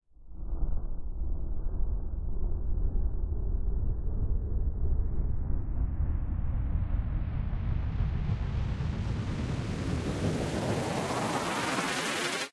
Long Panned Riser
Stereo panned riser (fade in) effect.
fx, fade-in, sfx, soundeffect, fade, electronic, drone, effect, riser